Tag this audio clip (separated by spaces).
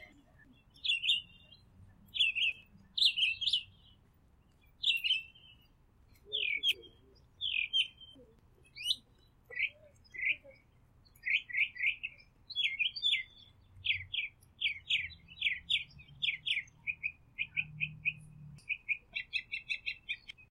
Crisp Field-Recording Bird